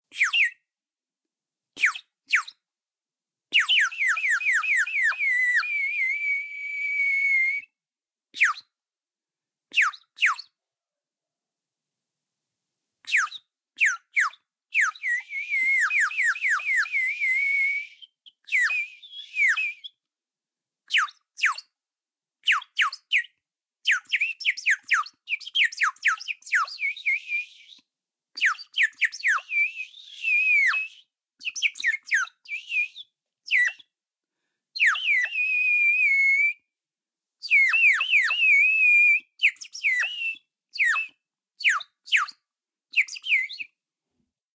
FX bird water whistle
Sound from a little toy bird whistle! Chirpy blackbirdish song and a little bubbling from the water towards the end.
Recorded Rode NT1000 / Wendt 2X / MBOX2 in Reaper.
bird, bubble, chirp, clay, eau, imitation, oiseau, toy, tweet, water